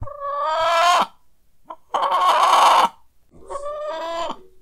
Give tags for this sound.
want nest hen farm chicken egg

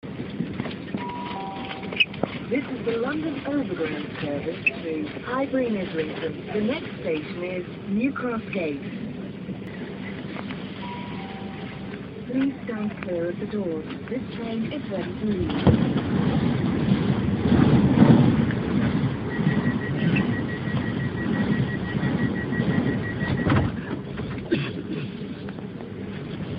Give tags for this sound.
announcement doors overground tfl transport

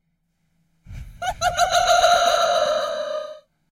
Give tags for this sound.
gnomes laughing

